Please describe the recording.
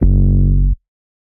Kick coming from Yamaha PSR-215 going to EQ-10 -> Morley Pro Series II -> Boss MD-2 ending up at UR44.
SERIES, MXR, MORLEY, II, KICK, HIP-HOP, HOUSE, MD-2, EQ-10, YAMAHA, PRO, BOSS, TECHNO